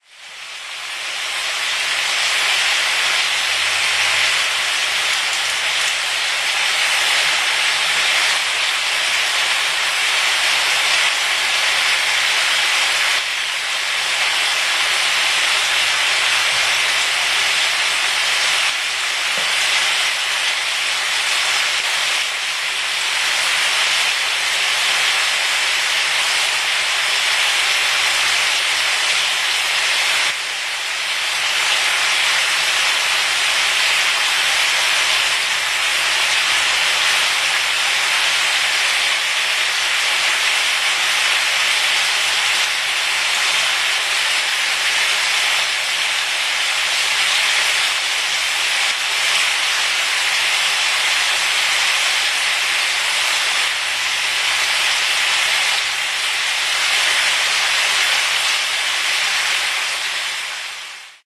frying tortilla filling040910
04.09.2010: about 14.00. I am preparing the tortilla filling. The sound of frying meat, onion, pepper. Poznan, Gorna Wilda street.